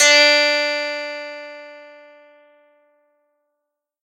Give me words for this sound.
I processed the samples from Kawai K1 ,using Paulstretch (Audacity) and looped them.